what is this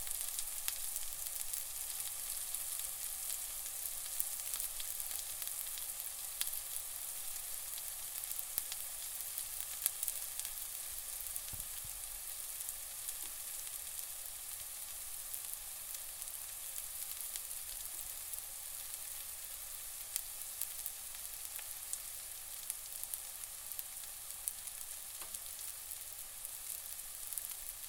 Cooking, frying 1
Onions frying in a pan
oil, fry, food, cooking, kitchen, pan, pot, cook, sizzling, sizzle, stove, frying